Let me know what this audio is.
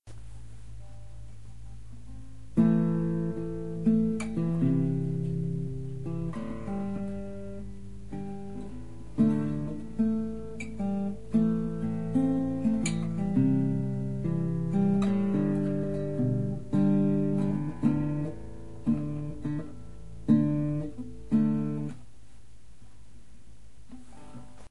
1024a-guitar mistakes
Playing and making mistakes on an acoustic guitar.
mistakes, acoustic-guitar